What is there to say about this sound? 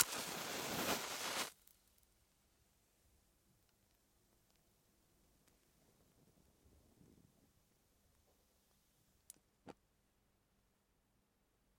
Match Burn 1
Recording of a match being burnt using an Octava MK12 through a DBX 586 Tube preamp
match, lightup, matches, Burning, light, 420, fire